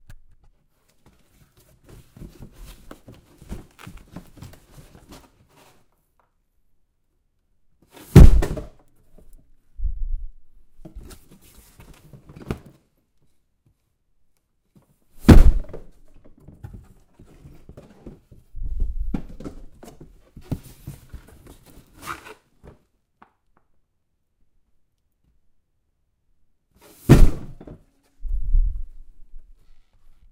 Slide Thump
Dropping a cardboard box full of heavy things a short distance to the floor. There is an audible slide as the box (which was kind of large) slides out of my arms to the floor.